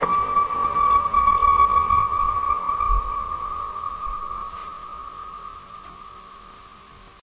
Raetis ping
The Raetis is a large balloon like sac that is plant like in nature, this ding is used to locate other Raetis plants nearby, as they will reflexively return the sound.
call, ding, Tartarus-B, Sonar